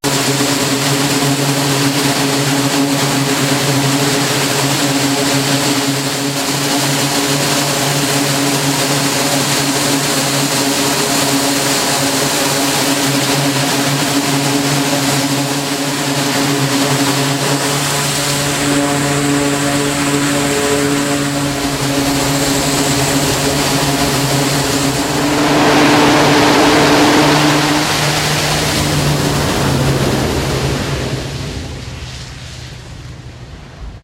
EMALS E-2D Advanced Hawkeye Lauch
Source video description: LAKEHURST, N.J. (Sept. 27, 2011) A U.S. Navy E-2D Advanced Hawkeye aircraft launches using the Electromagnetic Aircraft Launch System (EMALS). EMALS uses stored kinetic energy and solid-state electrical power conversion. This technology permits a high degree of computer control, monitoring and automation. The system will also provide the capability for launching all current and future carrier air wing platforms - lightweight unmanned to heavy strike fighters. (U.S. Navy video/Released).
Advanced, air, airplane, E-2D, EMALS, Hawkeye, launch, lift-off, liftoff, loop, looping, loops, noise, plane, propelled, propeller, repeating, start, turbo, turboprop, twin-turboprop